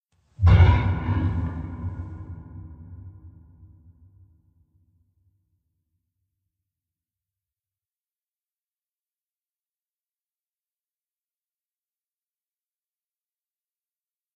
This pack of 'Monster' noises, are just a few recordings of me, which have lowered the pitch by about an octave (a B5 I think it was), and then have processed it with a few effects to give it slightly nicer sound.
beast, beasts, creature, creatures, creepy, growl, growls, horror, monster, noise, noises, processed, scary